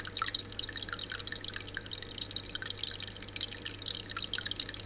fish.tank trickle
water falling to the tank, filter noise in the background /chorrillo de agua cayendo al acuario, ruido del filtro al fondo
field-recording, water, fish, house, nature